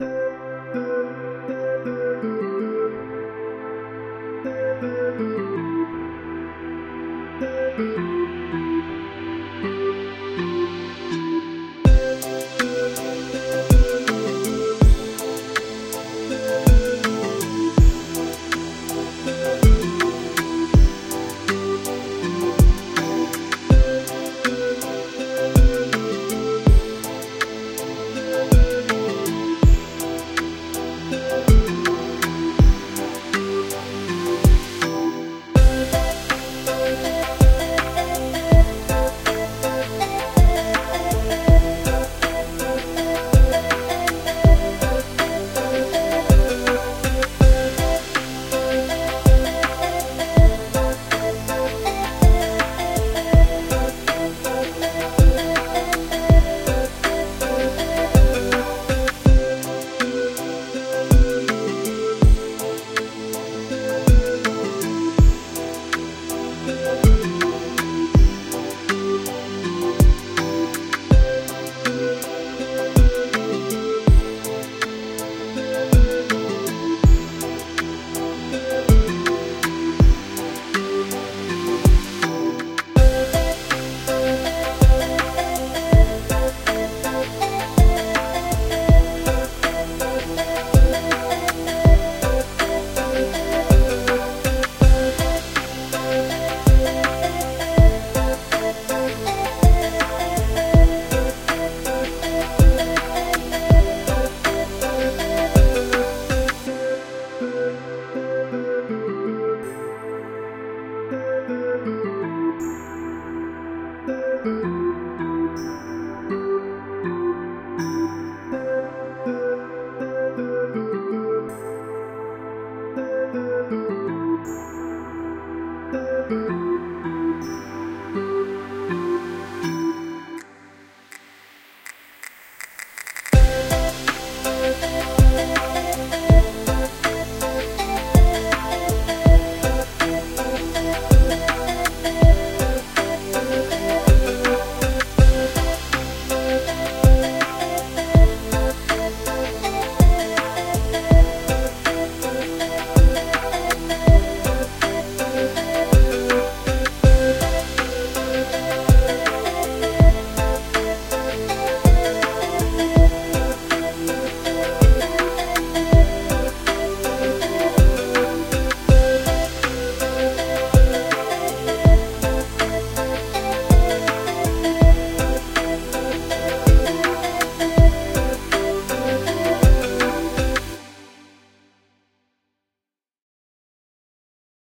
Cute Happy Background Music
happy lil song that you can use for your stuff!
No place for mr. sad and lonely.